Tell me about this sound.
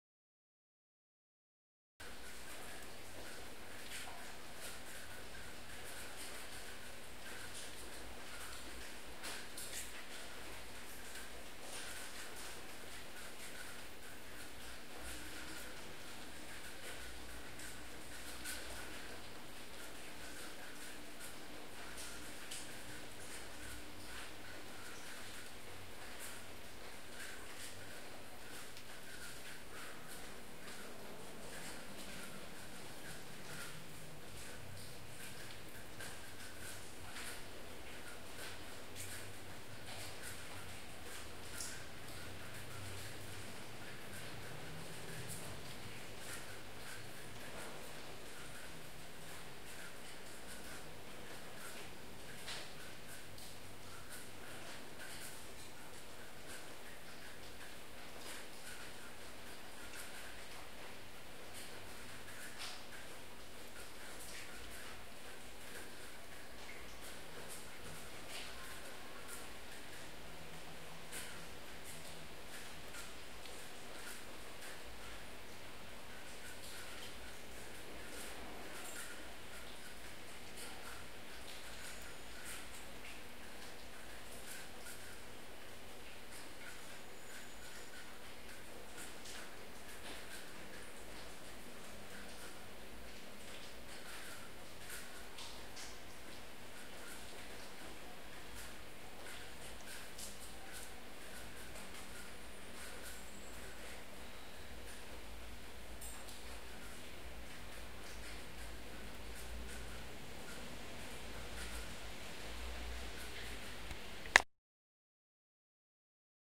LIGHT RAIN FROM APARTMENT
Light rain recorded with a TASCAM DR 40 from apartment in Barcelona City. Rain upon building, floor and rooftops. Background city noise